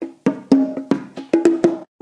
JV bongo loops for ya 1!
Recorded with various dynamic mic (mostly 421 and sm58 with no head basket)
loops, samples, tribal, congatronics, Unorthodox, bongo